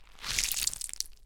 rubber anti stress ball being squished
recorded with Rode NT1a and Sound Devices MixPre6